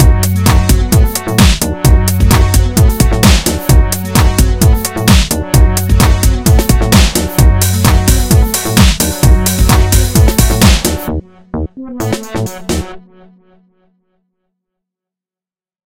Agent Movie Music (Inspired by James Bond Theme)
A Loop For Agent Movies
Film, Free, Movie, Orchestral